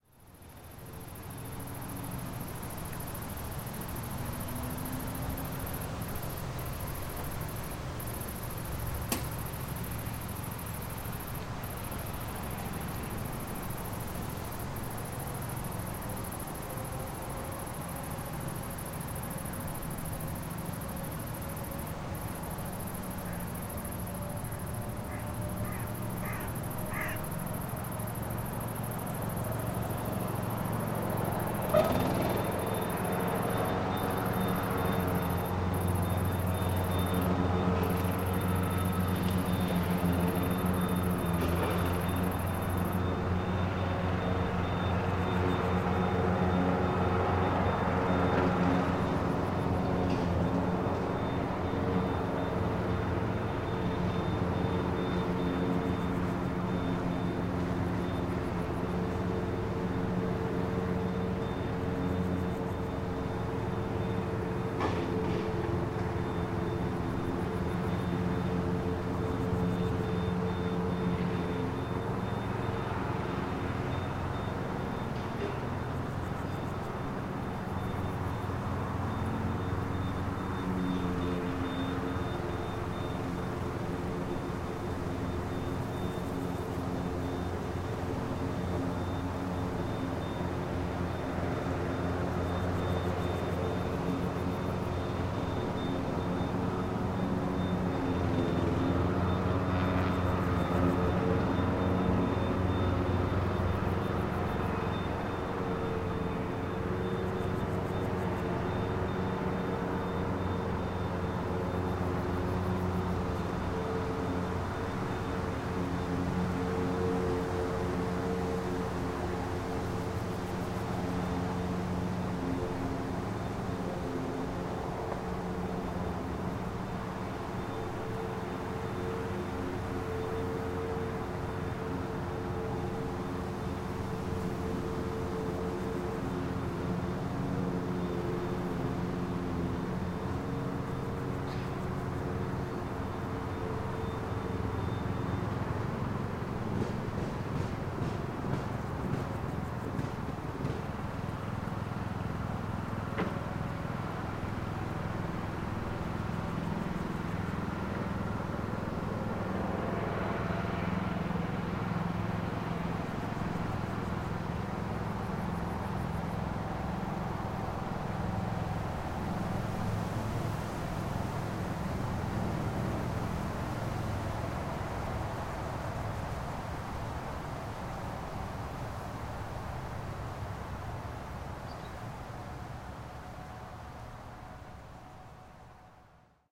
Krekels, sprinkhanen en grasmaaier Lichterveldestraat
Crickets and grasshoppers making noise with a tractor lawnmower entering the soundscape after a while. Nice contrast between these two elements. Notice how the cricket stops because the temperature dropped and the grasshoppers continue, like sonars.
This recording was made with a Sanken CS3-e shotgun mic on a Roland R-26 in the afternoon of 14th of July 2014 in Desteldonk (Ghent). Editing was done in Reaper. No compression has been applied.